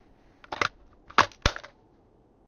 I created this by opening and closing the blot on an Airsoft gun